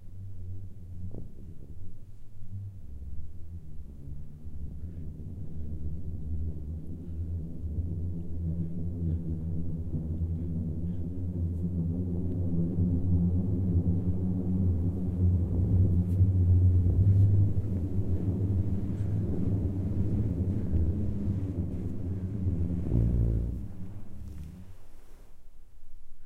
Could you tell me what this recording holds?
Booming sound created via an avalanche on Kelso Dunes.